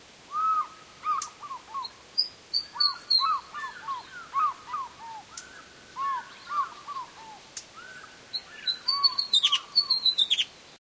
Fish Eagles recorded in Ndumo nature reserve, Natal, South Africa
bird, Birds, nature